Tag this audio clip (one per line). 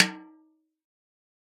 1-shot
multisample
snare